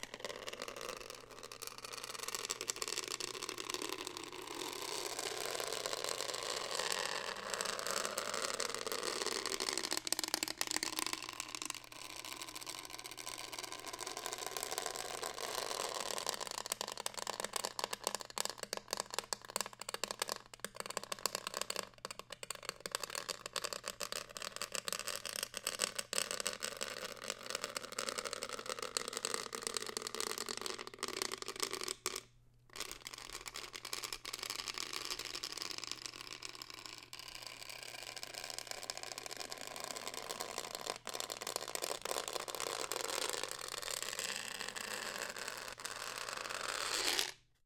Foley recording of a goose-neck reading lamp lamp (you can bend it into any position and it will stay that way) mounted to a bed in a hotel room. Features a lot of groaning, clicking, bending, stretching, etc. Could be used for rope torsion sfx, pitched down for metal stress / strain / fatigue, clicking elements could be used for creature sounds.